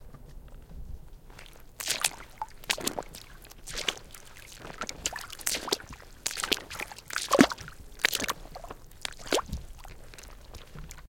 walking through a puddle